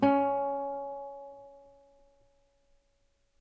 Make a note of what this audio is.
1 octave c#, on a nylon strung guitar. belongs to samplepack "Notes on nylon guitar".
tone, strings, music, nylon, guitar, c, notes, note, string